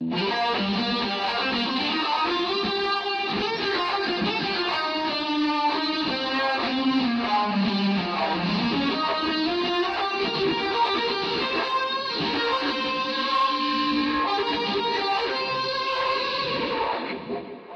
tk 4 37bfastamp
A heavy overdriven guitar sample made with my Strat and plenty of effects. Part of my Solo Guitar Cuts pack.
electronic, guitar, music, noise, processed